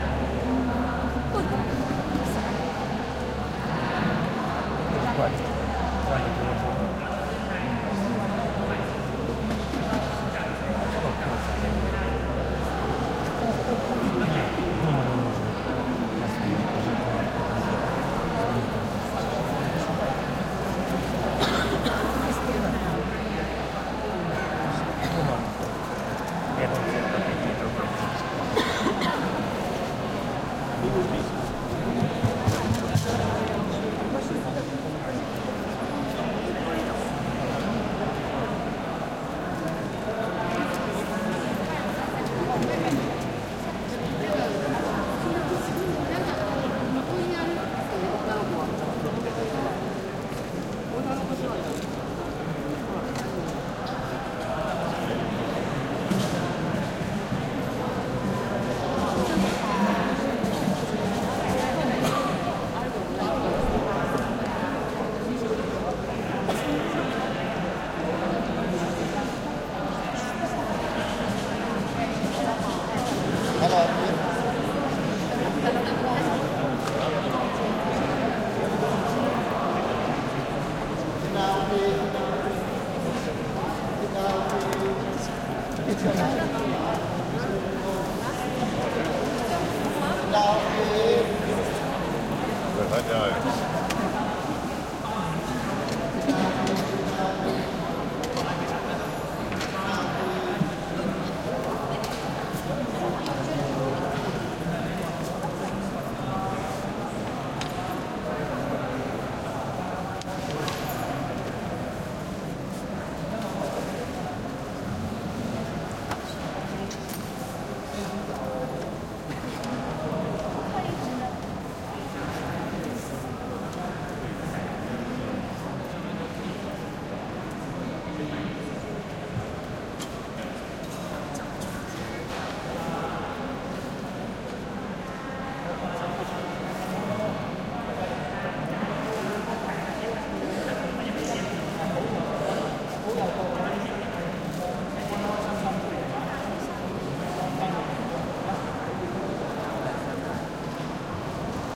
Thailand Bangkok, Grand Palace, Temple of Green Buddha crowd int large room echo temple or museum close and distant voices
Bangkok, Buddha, crowd, field-recording, Grand, Green, int, museum, Palace, temple, Thailand